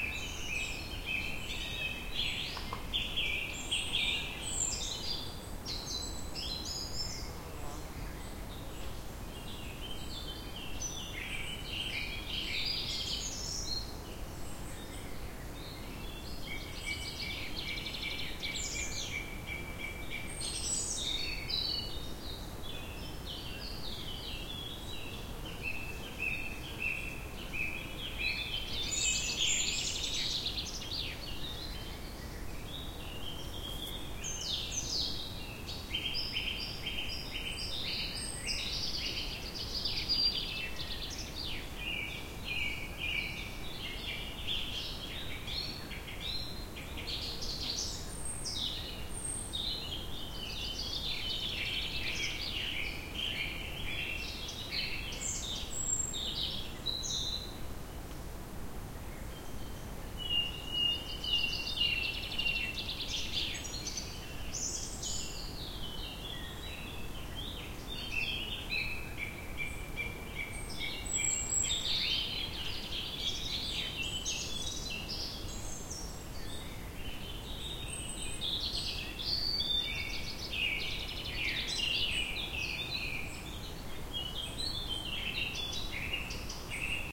140809 FrybgWb Forest Evening F
Evening in the forest above the German town of Freyburg on Unstrut, located in the valley between a vineyard and Neuenburg Castle.
Natural sounds abound: birds singing, insects, wind in trees and creaking branches, with a very nice "forresty" reverb.
The recorder is located at the bottom of the valley, facing towards the town, which, however, is no longer audible this deep in the forest.
These are the FRONT channels of a 4ch surround recording.
Recording conducted with a Zoom H2, mic's set to 90° dispersion.
4ch, atmo, forest, rural, summer, vineyard, woodland